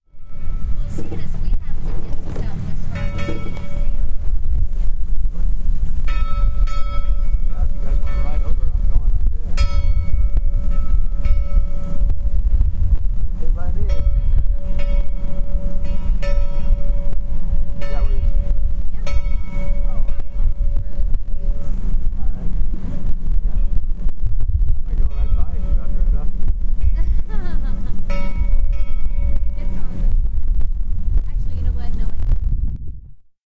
MaineBuoyBellNortheast Harbor
The sound of a buoy bell about a mile off Northeast Harbor, Maine as we sailed by in the 1899 sailing sloop Alice E.